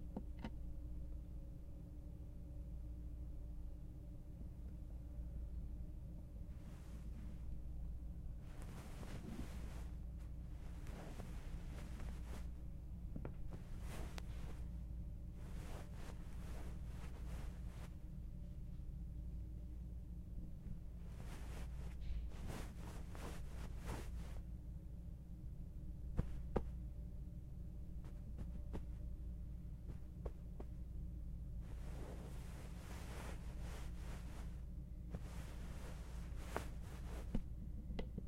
Rustling Pillow Sequence
fixing a pillow on a bed
bed, sheet